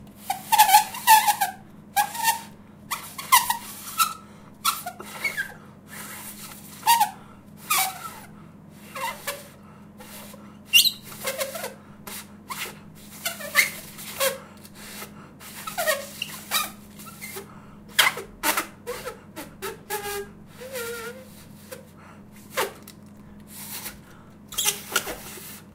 MIT MEINEN LIPPEN GEGEN EIN DÜNNES PAPER GEBLASEN
These samples were made with my H4N or my Samsung Galaxy SII.
I used a Zoom H4N mobile recorder as hardware, as well as Audacity 2.0 as Software. The samples were taken from my surroundings. I wrote the time in the tracknames itself. Everything was recorded in Ingolstadt.
ambiance, field-recording, nature